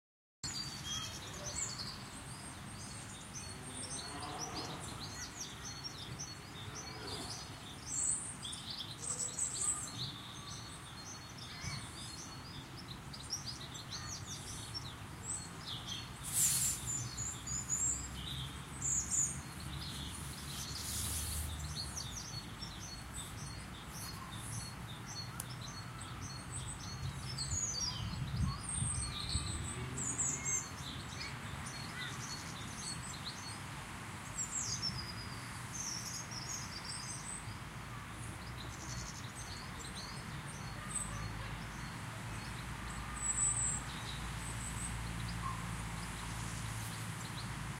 Chill with some birdsong, or use it in a video?